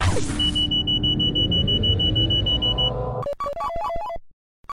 Shield recharging
electricity, nano, charging, armor, game, video, recharging, reloading, loading, future, Shield